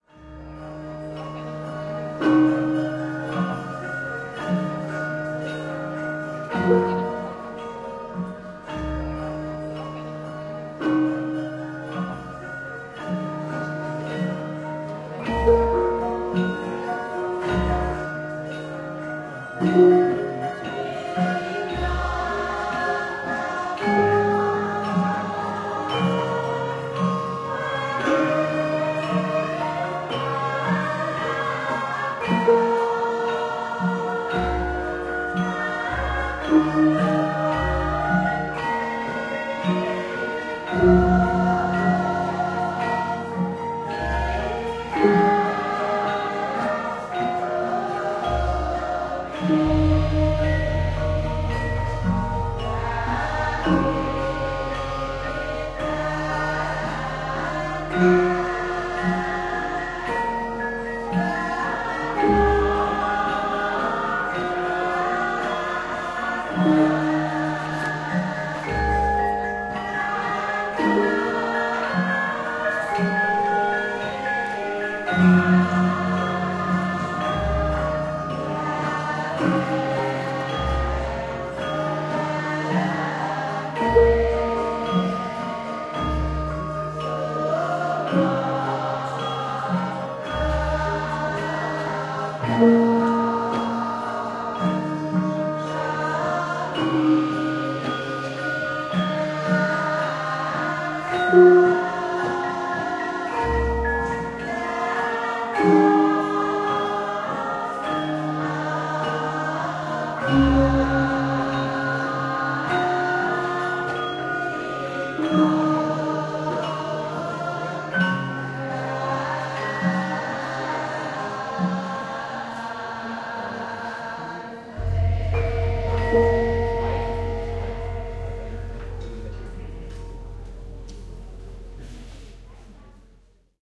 Javanese Court Gamelan 1 - Indonesia
A recording of Javanese Gamelan music performed by the court musicians of the Royal Palace of the Sultan of Yogyakarta, Excerpt 1. Kraton Ngayogyakarta Hadiningrat, Yogyakarta, Java Indonesia.
Asia, boning, court, field-recording, gamelan, gender, gong, Indonesia, Java, Jogja, karawitan, kendang, Kraton, metallophone, music, palace, peking, pendopo, percussion, saron, Sindhen, sultan, swara, traditional, vocal, wayang, xylophone, Yogyakarta